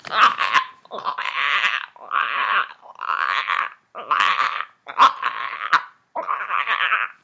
sound I made with my voice
Gollum, retch, vocal, voice